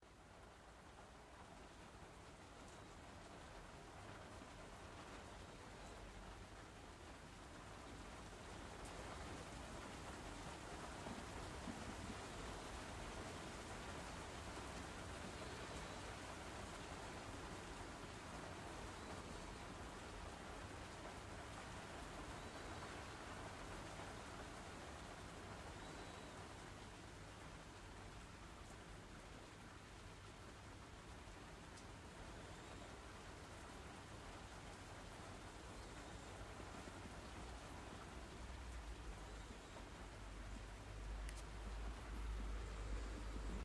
Rainfall on a wet day
field-recording, Rain, Storm, Weather